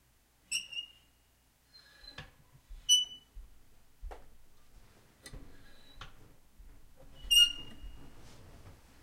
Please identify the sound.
Metal Squeak 1
Metal fireplace door creaking, 3 mics: 3000B, SM57, SM58
door, metal, squeak